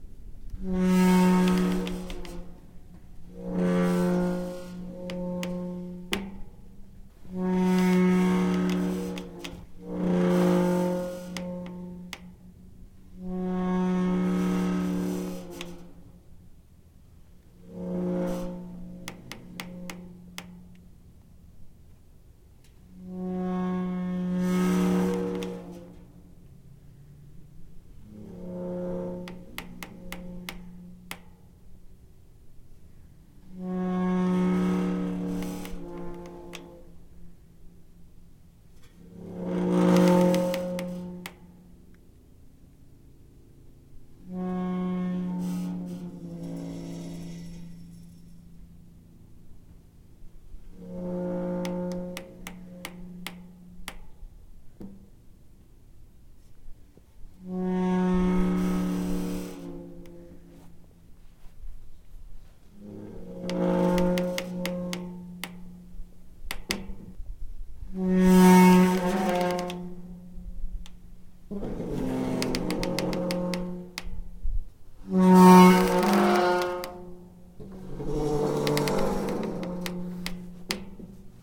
Close-mic of a medium size squeaky metal gate outdoors.
Earthworks TC25 > Marantz PMD661